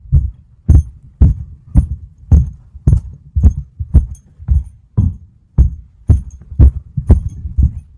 Godwalking [FAST]
Atmosphere, Evil, Freaky, Halloween, Horror, Scary, Terror